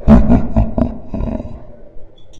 from my mod - just a really deep laugh